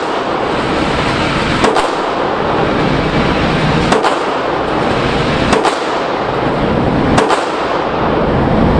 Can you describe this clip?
GunRange Mega9
Shots from 9mm or 45 caliber